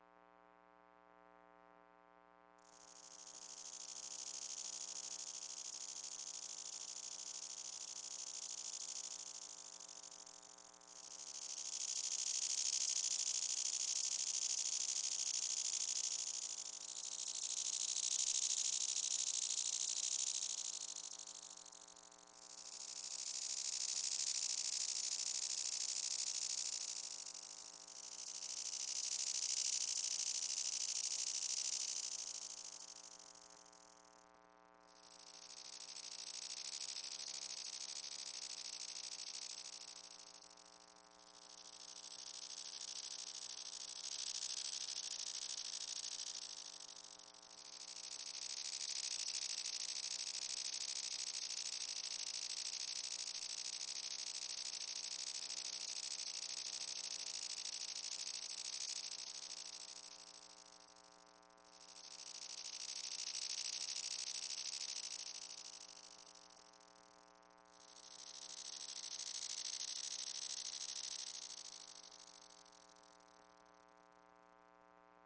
cicada glisson synthesis
A cicada's stridulation was modeled digitally using a form of granular synthesis known as glisson synthesis.
synthesis, cicada, granular, glisson, glisson-synthesis, bioacoustics, pulsar-synthesis